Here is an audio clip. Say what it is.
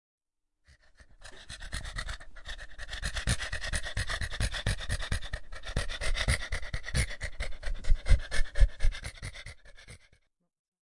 dog breathing 1
dog, CZ, Czech, breathing, Panska